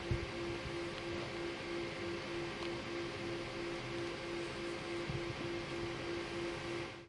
141118-TechnicalRoom H2nMS
Sound Description: Das Brummen des Technikraumes - Buzz of a technical room
Recording Device: Zoom H2next with MS-capsule
Location: Universität zu Köln, Humanwissenschaftliche Fakultät, 214 EG
Lat: 50.933404
Lon: 6.919723
Date Recorded: 2014-11-18
Recorded by: Timea Palotas and edited by: Alexandra Oepen
This recording was created during the seminar "Gestaltung auditiver Medien" (WS 2014/2015) Intermedia, Bachelor of Arts, University of Cologne.